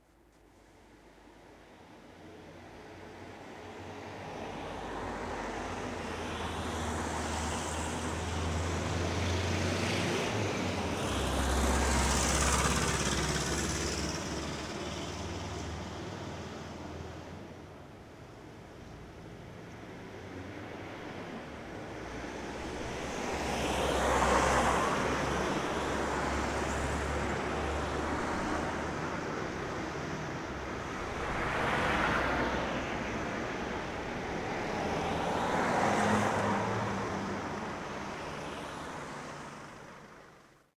hastings traffic
The busy road where we live - traffic sounds with faint shouting
busy-road, cars, junction, traffic